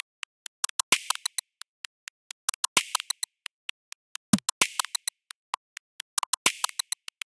GlitchDybDrum2 130bpm
ABleton Live Synthesis
loop, glitch, drum